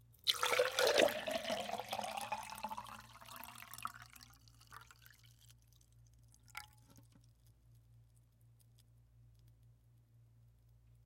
Pour into Glass No Ice FF355
Continuous pour of liquid into empty glass container until glass is full, loud
empty, Continuous, pour, glass, liquid